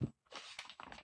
Libro abierto
book, notebook, open